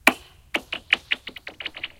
Ice Crack 9
break, crack, foley, ice, ice-crack, melt